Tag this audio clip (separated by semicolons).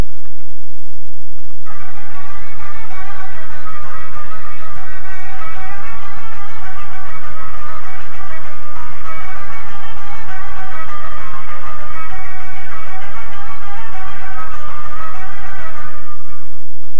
beatsample; hip; loop; music; song